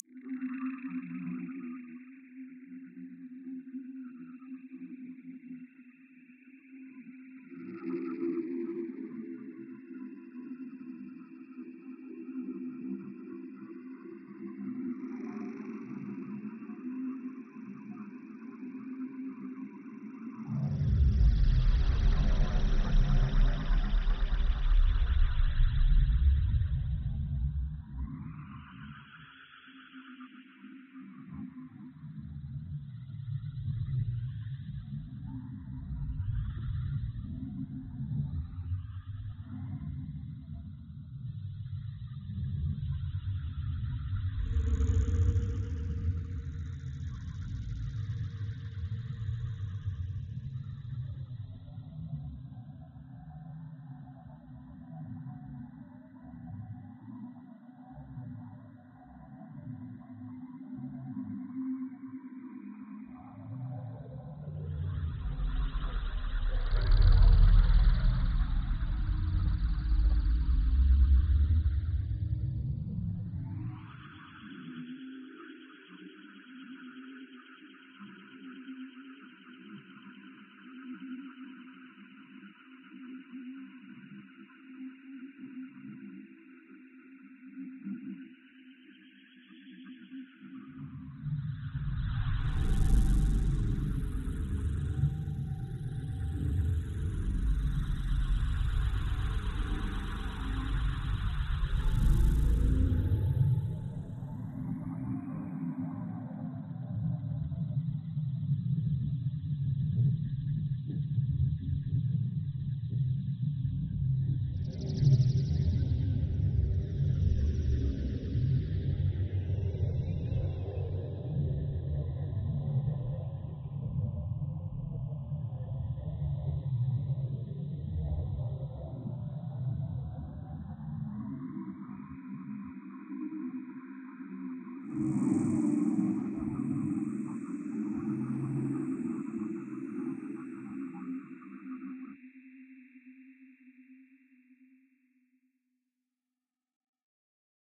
Sci-Fi Ambient Sounds
I decided to make some Sci-Fi (Forbidden Planet) inspired ambient sounds and I came up with this. I used Harmor from Image-Line to create these sounds. I hope you like it!